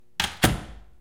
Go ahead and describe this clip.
wood door closes